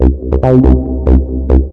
progressive psytrance goa psytrance